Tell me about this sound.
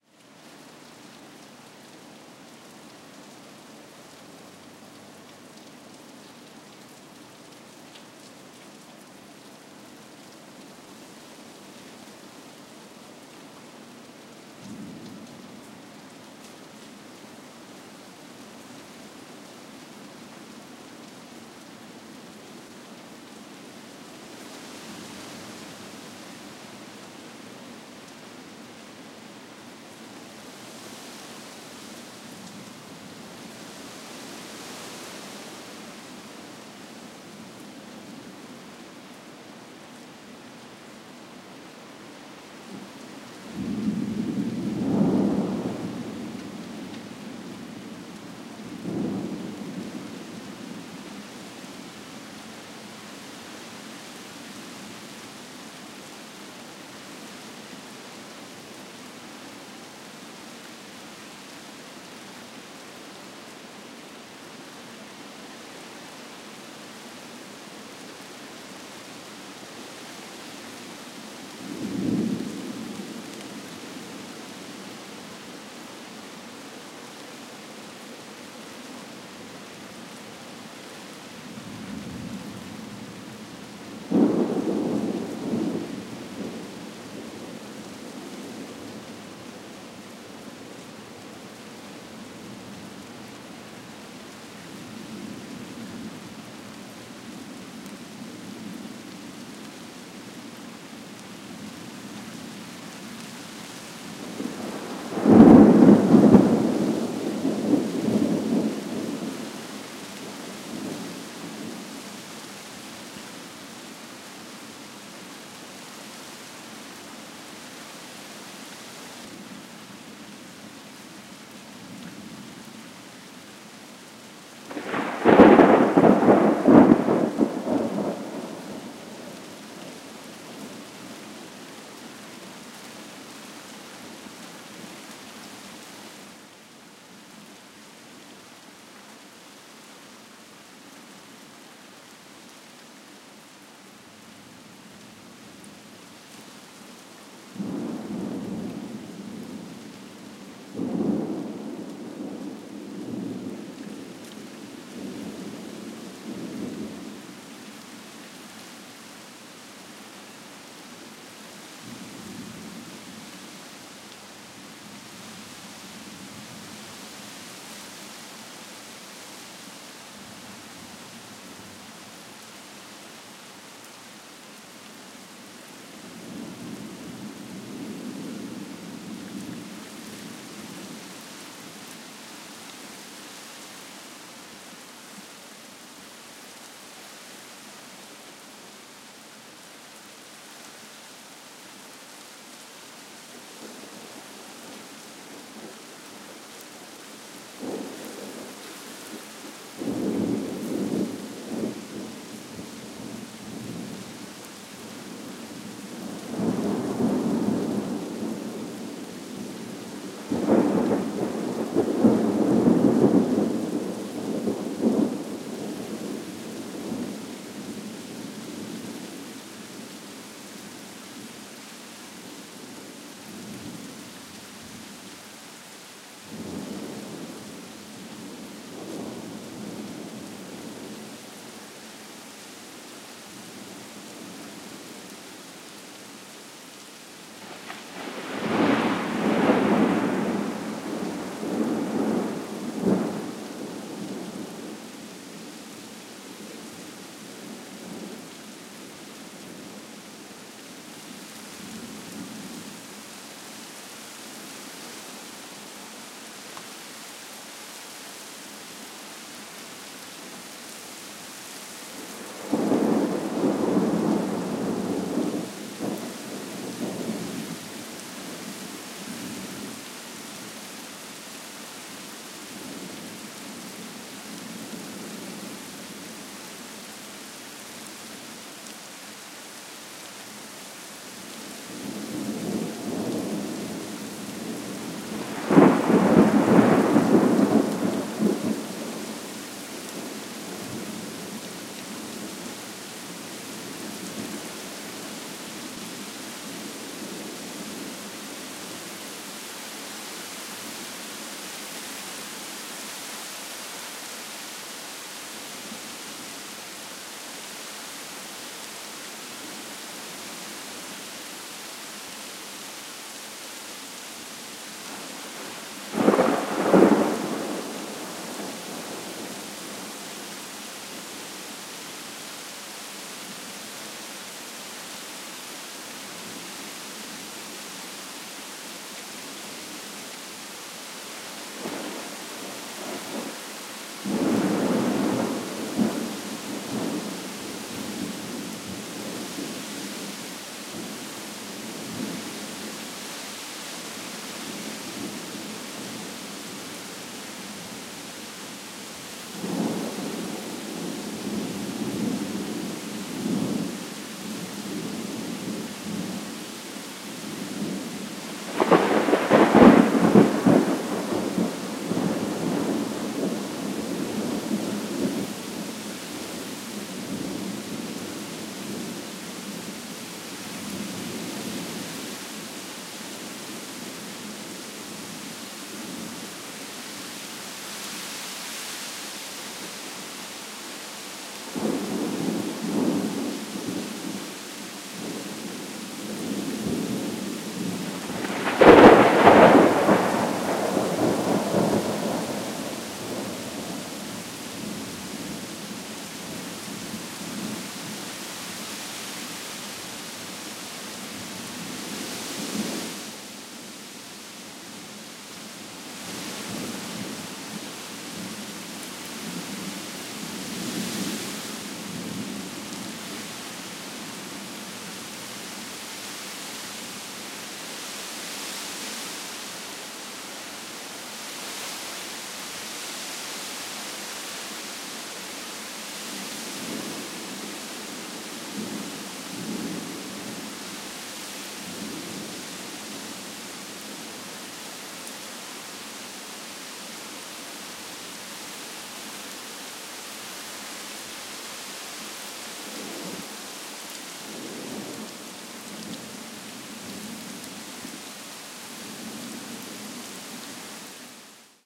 Recording of a thunderstorm moving through a Chicago neighborhood. Long sample with two progressively louder sequences of thunder. Trees swaying in wind, rain splashing. Recorded with a mini-DV camcorder with an external Sennheiser MKE 300 directional electret condenser mic. Minimal processing.